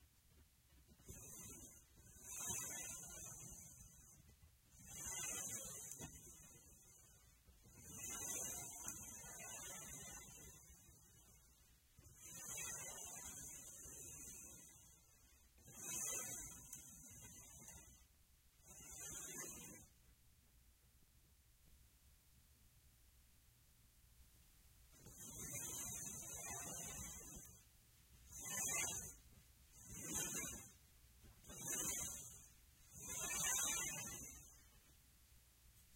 Just a basic sample of sliding an empty propane tank on a wood surface.